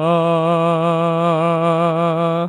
Singing "Ahh"; Male Voice